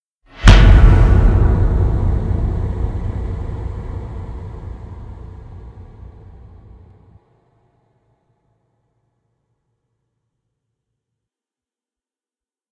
Cinematic Jump Scare Stinger
scary, sinister, suspense, terror, terrifying, drama, stinger, dramatic, creepy, scare, jump, horror